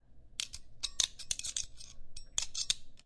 Metallic objects rattling off each other.